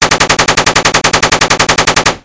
Assualt Rifle Shooting1
I created this sound with a small sample made by "pgi's" which I reused it multiple times right after another and changed the speed to create this amazing sound.
War, Fire-Fight, Assault-Rifle, Rifle, Machine-Gun, Shots, Modern-Warfare, Combat, Battle-Field, Realistic, Battle, Gunshots, Video-Game, pgi, Gun, Sub-Machine-Gun, Weapon, Light-Machine-Gun, Shooting, Firearm, Action